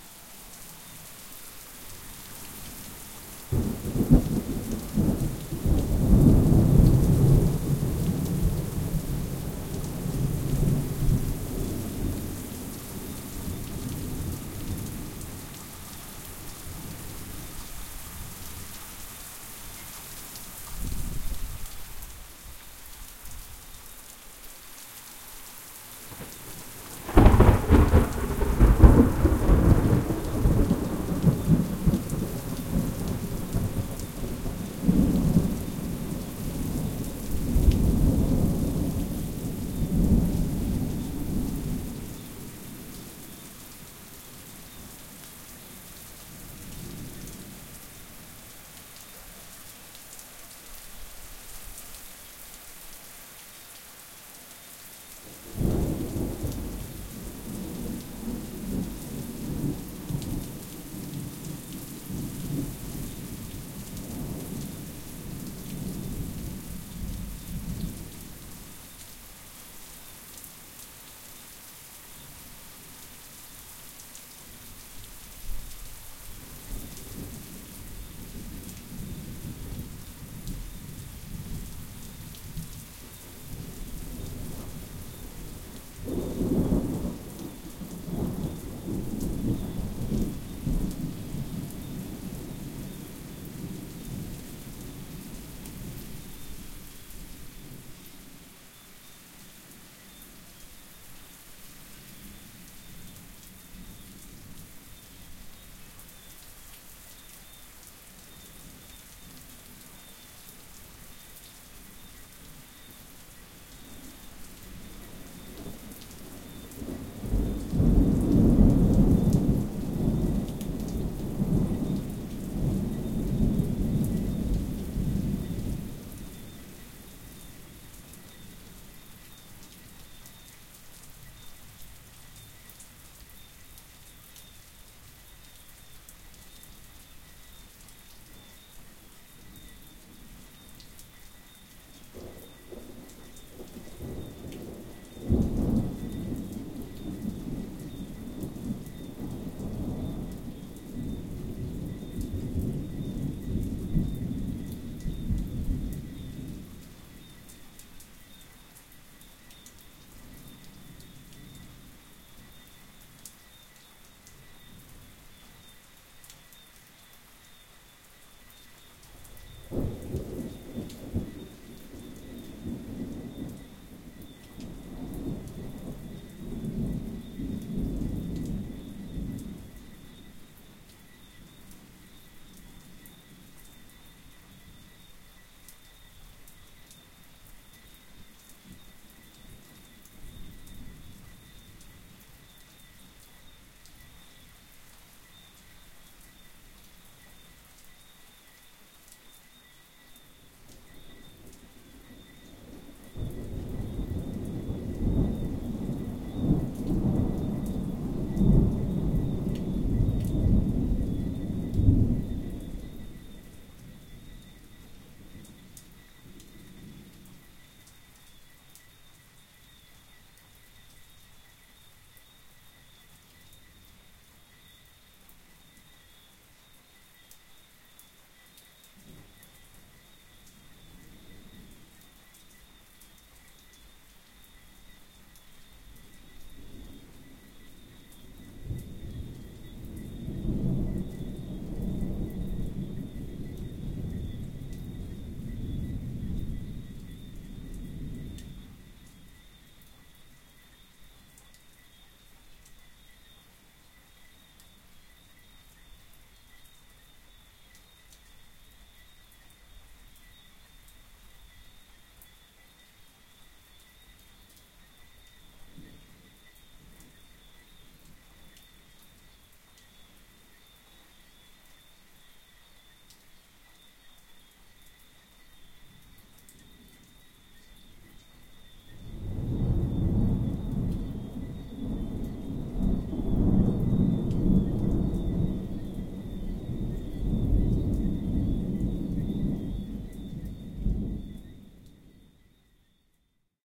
A strong thunder storm passes over the island of Bermuda in the Atlantic Ocean at 4:00am. Ten thunderbolts of varying intensity can be heard against a backdrop of falling rain and singing treefrogs. Useful for tropical sounding rain storm.
deluge, downpour, field-recording, lightning, nature, rain, rain-storm, storm, thor, thunder, thunder-storm, thunderstorm, tree-frogs, tree-frogs-singing, tropical, tropical-thunder, tropical-thunder-storm, weather